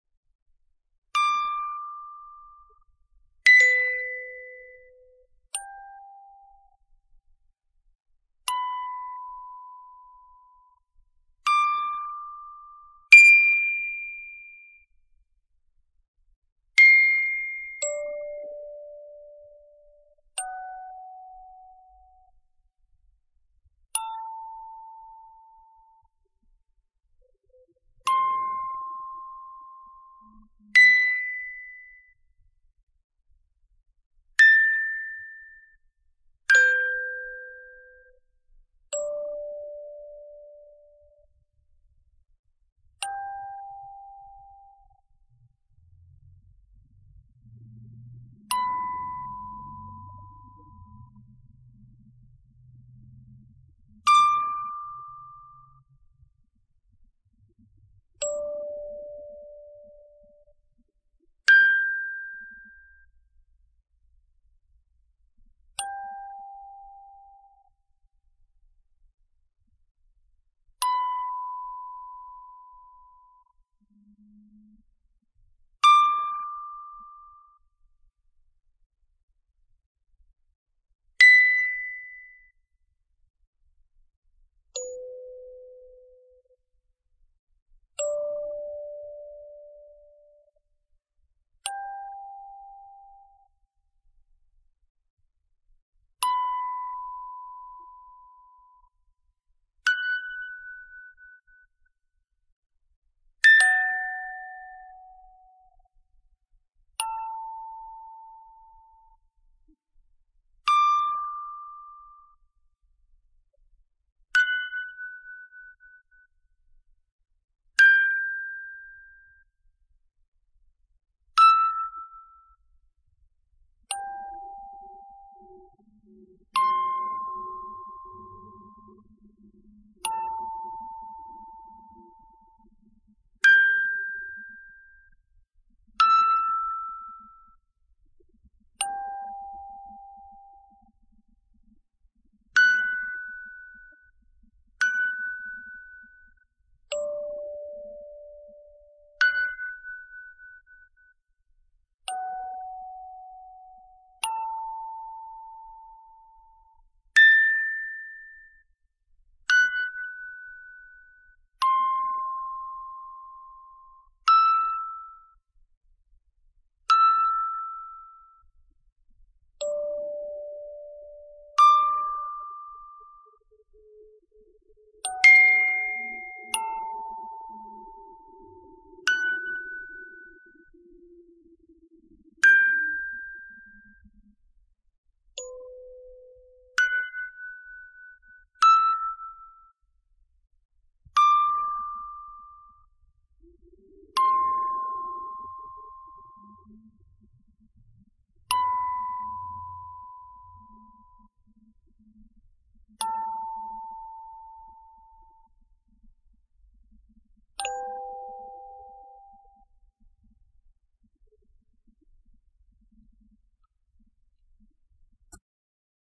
A slowed down vintage carillon played with a manual lever.
old, carillon, metal, slow, sad, baby, clock, mechanical, hand, iron, vintage, sick, down, slowed